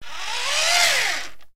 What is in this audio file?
toy car rolling on floor. Recorded in studio near the toy, short